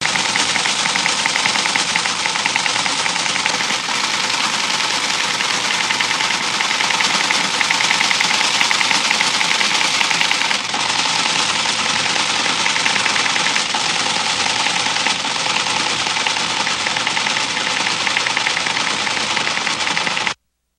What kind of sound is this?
Telex Machine 01
The sound of a 1970's telex machine hammering automated text onto paper. Useful for displaying location information on screen in your movie. This is audio taken from an old clip of video I once took in a technology museum. The original clip is from the early 90's, filmed with a camcorder, so the audio is a bit lacking in the high frequency spectrum. I separated the audio from the video using Adobe Audition CS6.
appear, film, telex, info, making, machine, location, screen, text, sound, movie